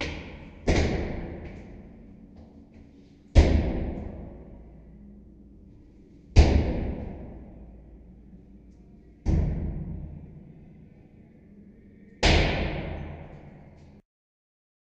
thumps-wind
me jumping in a stair case,
sm57, bass, stairwell, bang, kick, reverb, natural